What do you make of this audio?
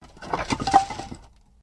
Falling logs in a woodshed 01
Falling logs in a woodshed
Recorded with digital recorder and processed with Audacity